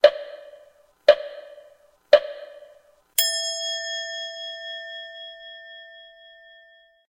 Ikkyu san
Ikkyu_san is the Japanese old animation, and Ikkyu is a name of the boy-hero.
He is a Buddhism child priest.
This sound is not the original sound.I created it.
animation, movie, game, film, cartoon, video